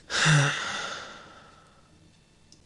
Sigh 3 Male
A young male sighing, possibly in frustration, exasperation, boredom, anger, etc.